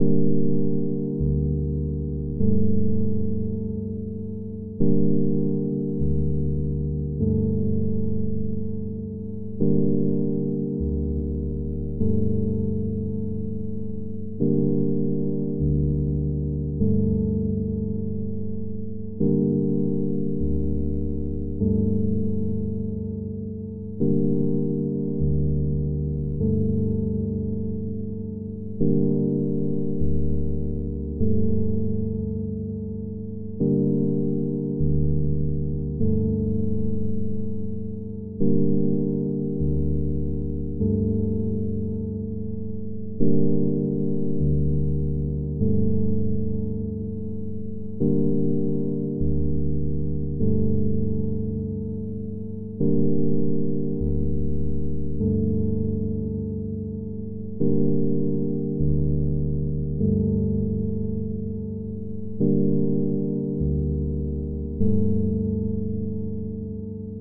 Ambience for a musical soundscape for a production of Antigone
deep, musical, electric-piano, ambient, bass, soundscape, dark